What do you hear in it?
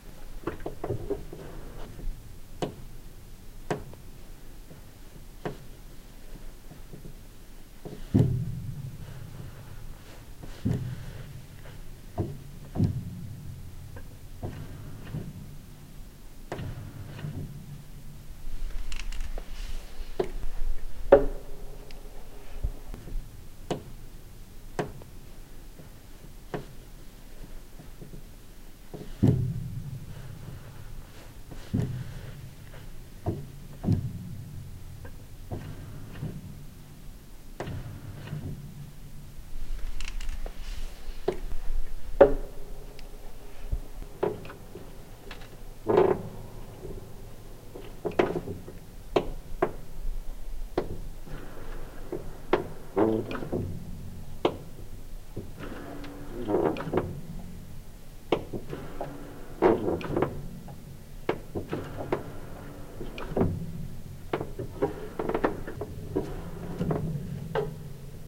Baldwin Upright Piano Creaks
Baldwin Upright Piano bench and pedal squeaks
horror, piano, creak, squeak, old